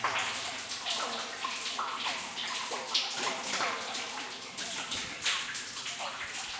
Water Dripping
Water drops falling in a deep well. Recorded with Zoom H4N and edited in Adobe Audition.
dripping, water, drops